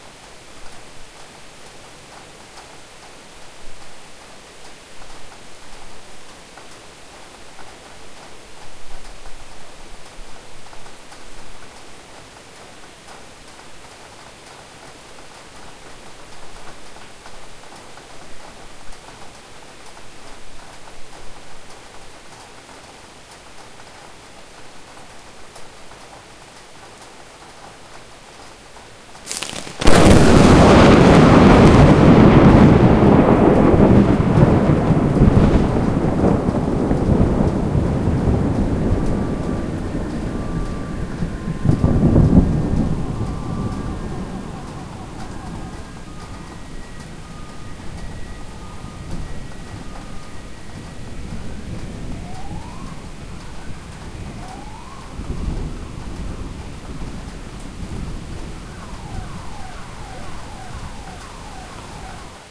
A rain & thunder lightning close & cars sirens loop
close, cars, lightning, weather, loop, storm